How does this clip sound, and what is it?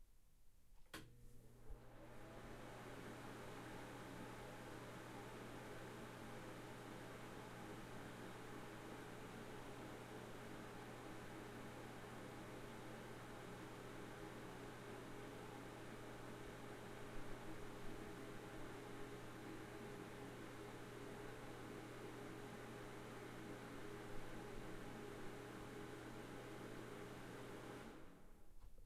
Bathroom Fan Far away with switch sound 2
Bathroom exhaust recorded on the zoom H5 at a distance of a few feet away. I also denoised and cleaned up any artifacts. Good for ADR. Enjoy!
field-recording, zoom, h5, sound, denoised, quality, bedroom, cloth, zoom-h5, foley, high, house